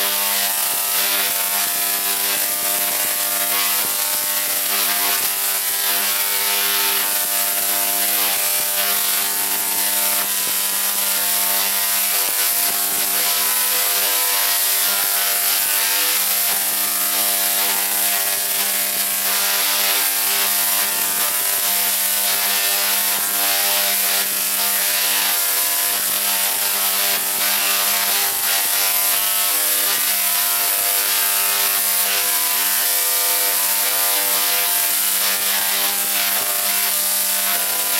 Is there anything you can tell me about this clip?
Big Tesla coil sound cut
field recording made of my tesla coil in Cambridge 2016
high-voltage, electricity